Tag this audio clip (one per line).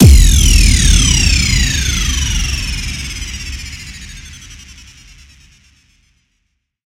boom
trance
climax
house